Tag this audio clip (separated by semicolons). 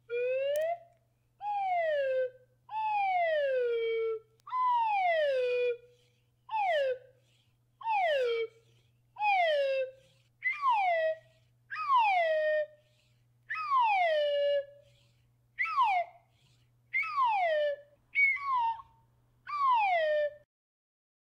down; drooping; fall; falling; slide; up